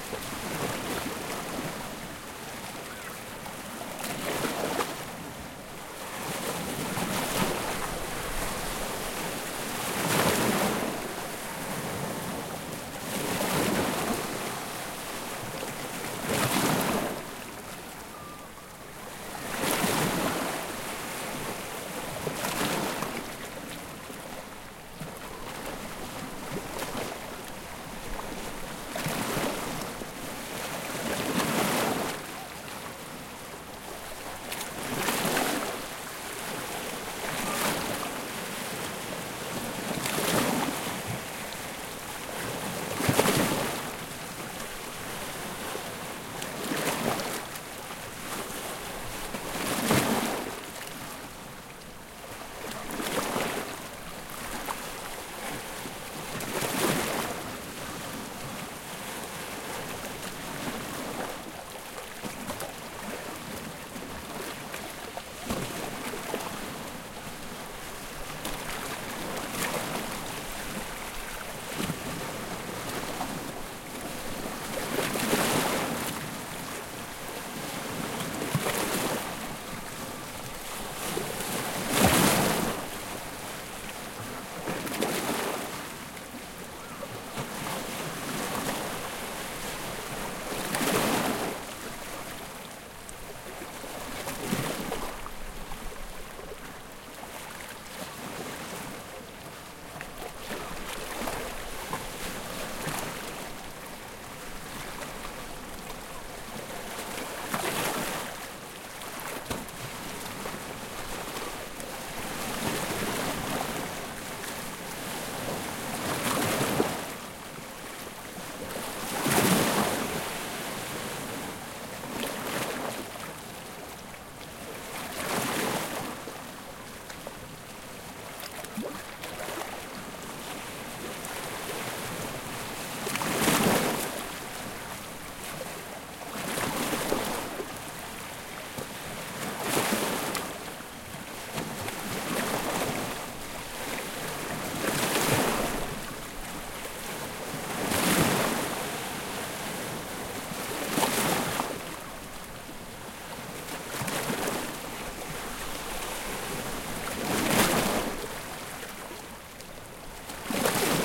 One am record in Odessa, Ukraine. It was a good time there))